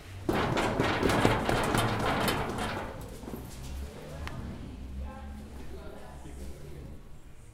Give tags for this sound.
Running; Footsteps; ZoomH4N; Stairs

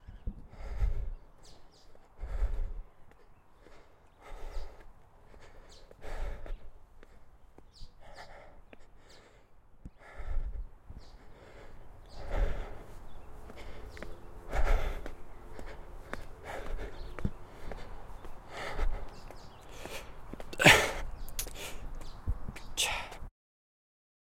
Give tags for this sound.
Man; OWI; Run; Running; Stamina

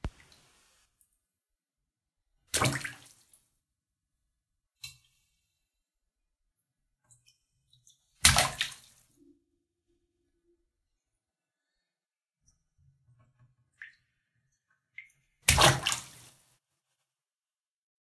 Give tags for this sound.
splash; water; drip; small

